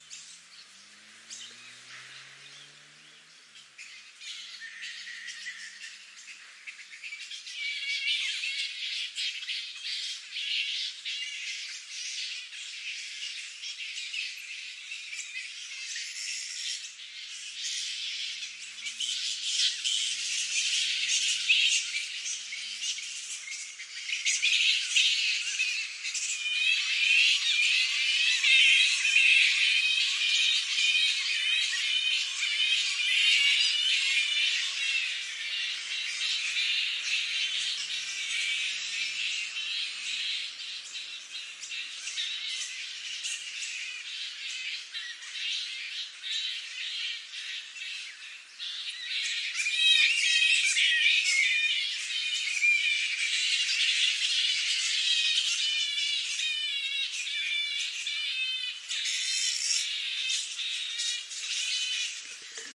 A tree full of bats